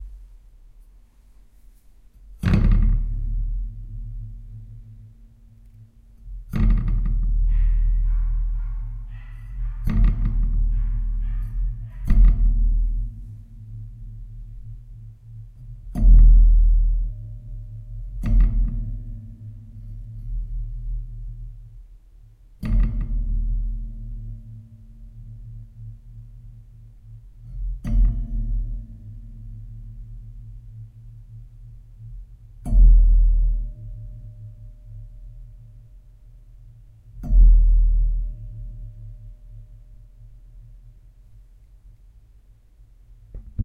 Deep Hit
Recorded with Zoom H1 and contact microphone. Hitting the wooden body of a small raft with my palm
boat, hit, percussion